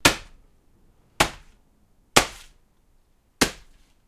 I needed a sound to combine with broken glass for a video showing a rioter breaking a police windscreen with a stick. I did this by breaking a plastic plant pot on a pile of newspapers with a broom handle.
Recorded using the FiRe app on my ipod touch 3g using a blue mikey microphone
Just interesting to know!